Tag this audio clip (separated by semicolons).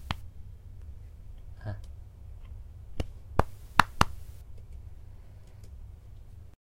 hit; shoulder